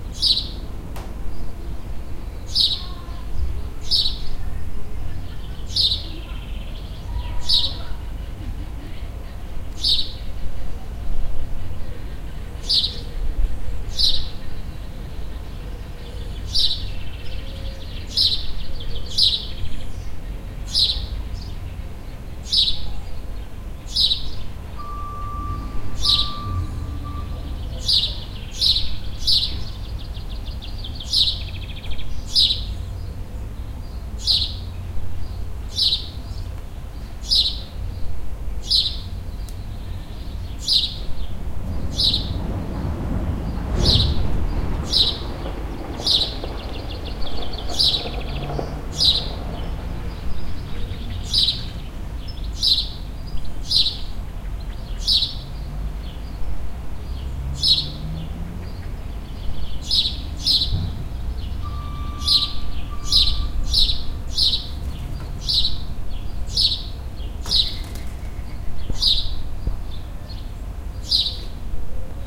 some wind may blow :)